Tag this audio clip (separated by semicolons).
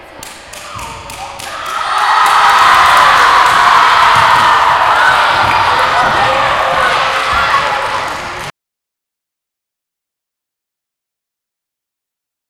noisy; noise